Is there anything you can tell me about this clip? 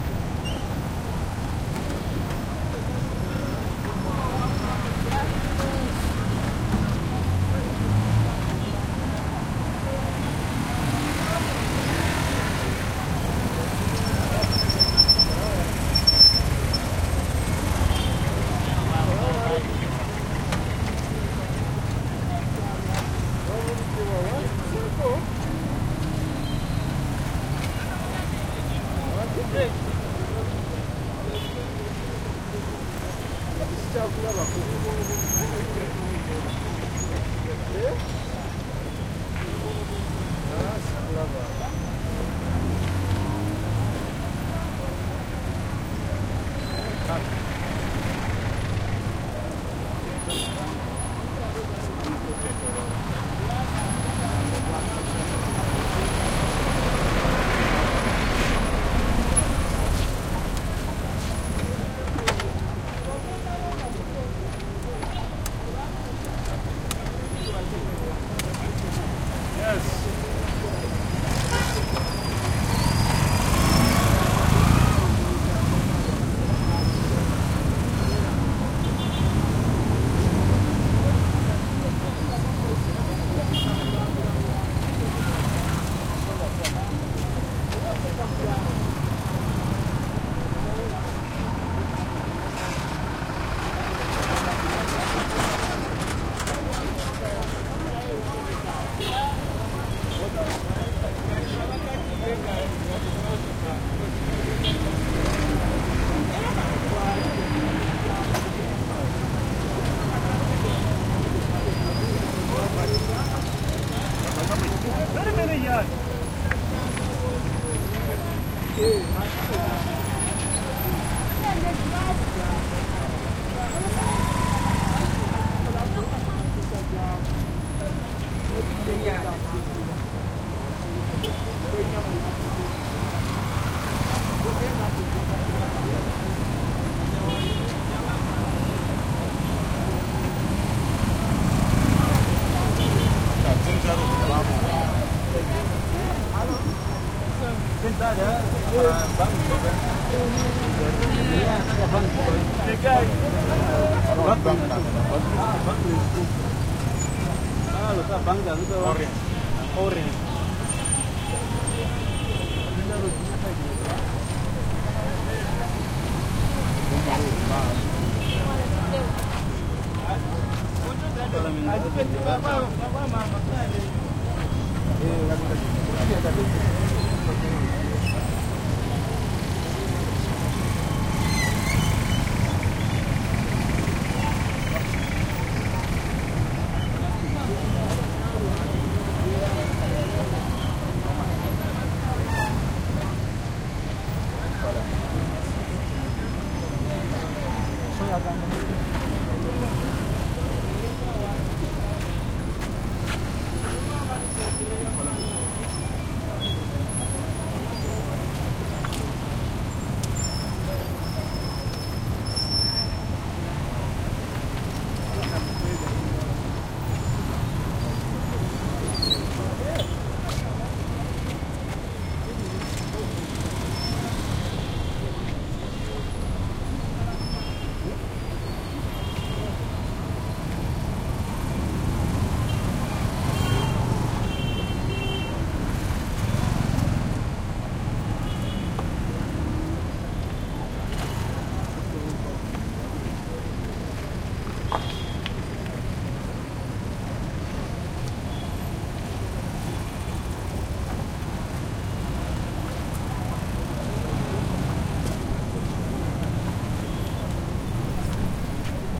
traffic heavy traffic circle roundabout by market throaty cars motorcycles mopeds and people Kampala, Uganda, Africa 2016
traffic, circle, city, heavy, cars, Uganda